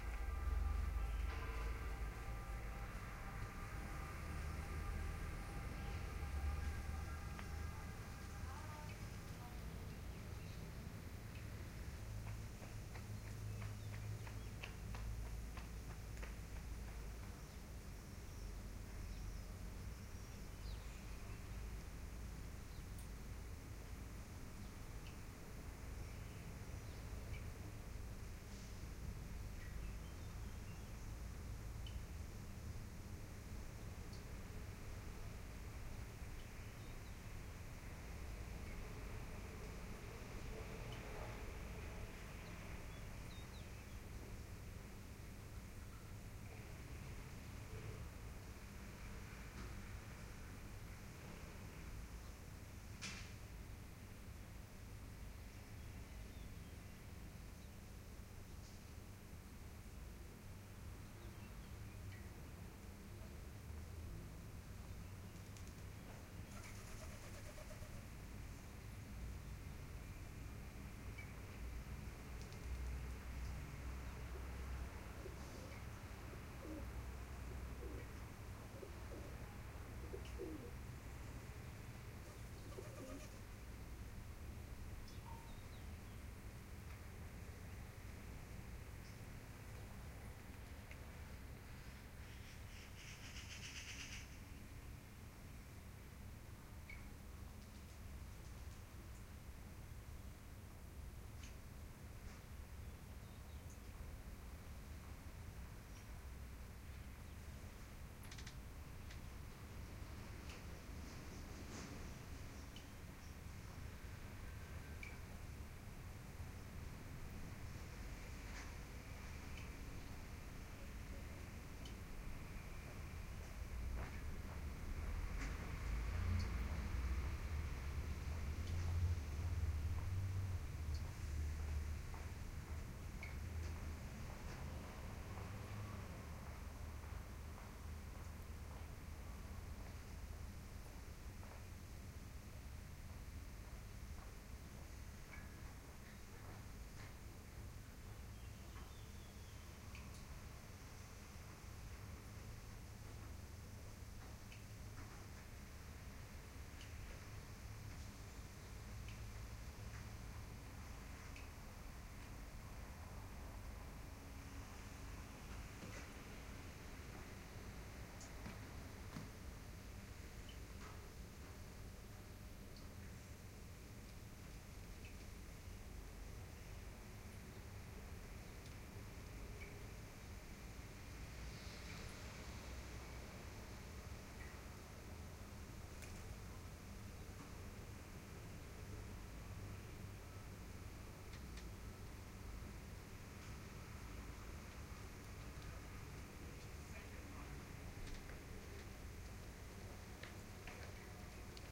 Siena Morning Late
Siena, Italy in the morning. Made with Zoom H4 recorder and binaural Core sound set of microphones. August 2012
Very quiet environment.